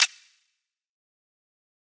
menu move2
application, command, space-ship, click, menu, interface, futuristic, computer, game, terminal, UI